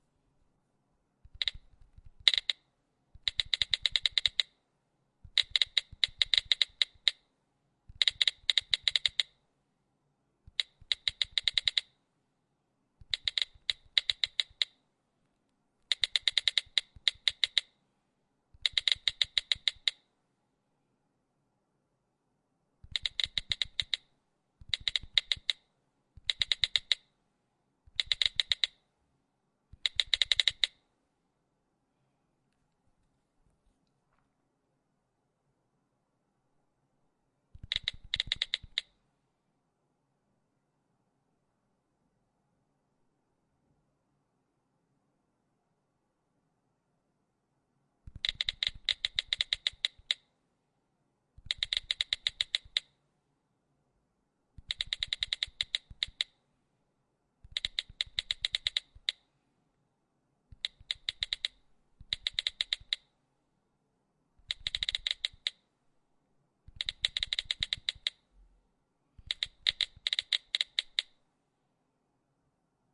typing-phone
I recorded different variants of typing with my phone - it's good for titles, revealed as if they're being typed.
Recorded with Zoom H4n.
dial, phone, typing, buttons, smartphone